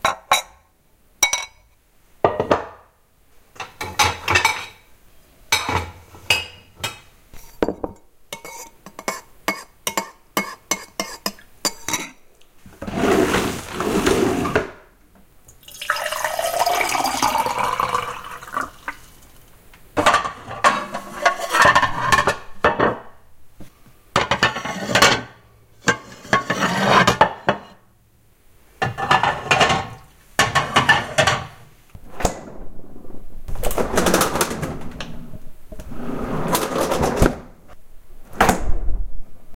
KITCHEN SFX
This collection of kitchen sound effects was captured on a Tascam DR-07. I carried the recorder around the kitchen opening and closing drawers, the refrigerator, and clattering/clanking plates and silverware. I even did a water pour into a drinking glass. After recording I edited the sounds in ProTools using a variety of compression and limiting plugins. I hope you find this useful.
dishes, drawer-close, drawer-open, kitchen, refrigerator, silverware, water-pour